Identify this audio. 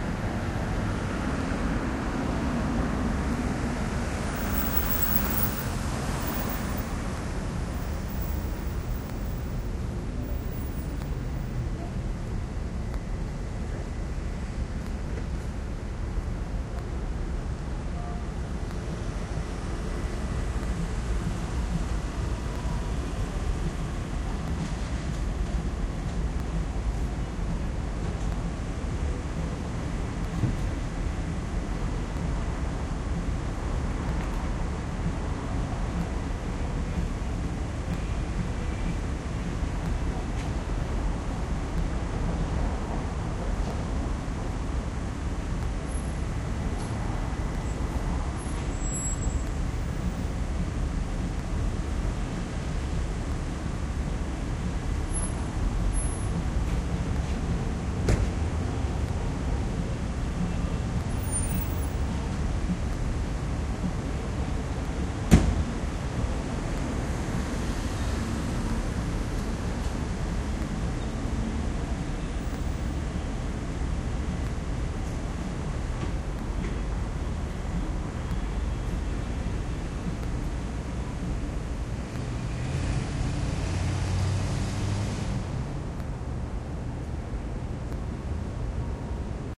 Sounds of the city and suburbs recorded with Olympus DS-40 with Sony ECMDS70P. Sounds of traffic downtown in West Palm Beach.
traffic, city, field-recording